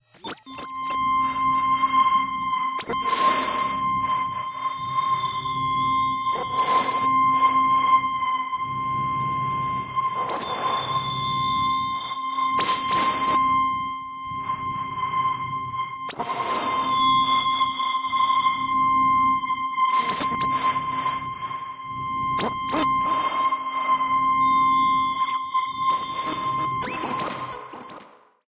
starObject ToneSplendur
Careless asteroid whispers in the dark (of space).
sound-design, experimental, sfx, sci-fi, atonal, space, abstract, resonant, synth, fx, effect, sound-effect